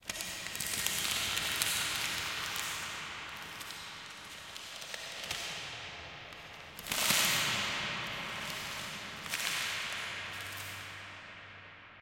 rocks falling in cave
OWI, cave, rocks-falling, film